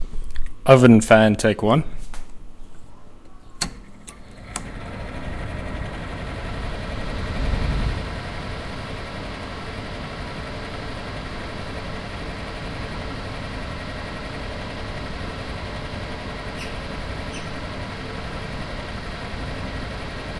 180081 Oven Fan 01
The noise of an oven fan humming
Hum, OWI, Appliance, Baking, Oven, Cooking, Fan, Kitchen